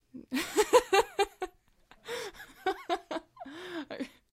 Female Laugh 3
Recording is fun, some of the lighter moments extracted from vocal takes (singing). Recording chain Rode NT1-A (mic) etc...
female-voice, fun, happy, laugh, laughter